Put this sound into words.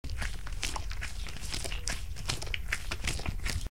slime noise 7 1
Slime noises done by J. Tapia E. Cortes
SAC, live-recording